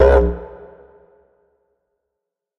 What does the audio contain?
Dino Call 4
short didgeridoo "shot" with some reverb added. enjoy.
deep, didgeridoo, dinosaur, effect, oneshot, organic, short